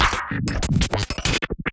glicz 0013 1-Audio-Bunt 3
bass, breakcore, bunt, digital, drill, electronic, filter, fx, glitch, harsh, IDM, lesson, lo-fi, noise, NoizDumpster, rekombinacje, square-wave, synthesized, synth-percussion, tracker, VST